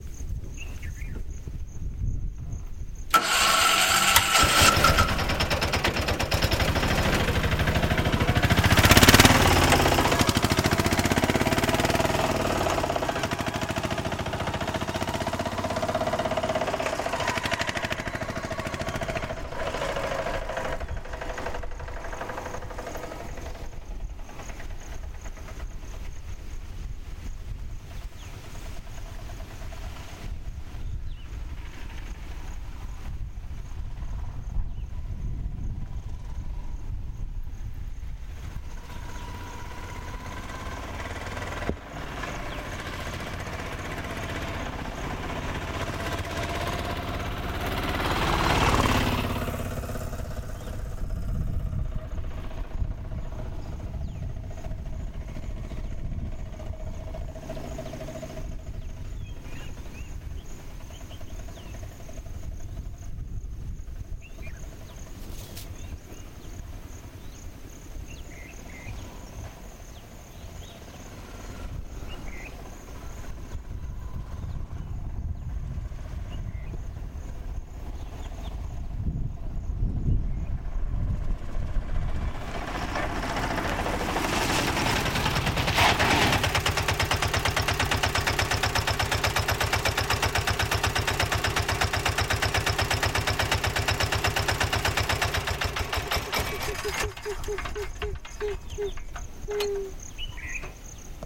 Indian Auto rickshaw, start leave and approach
sound, soundeffect, foley, Location, sfx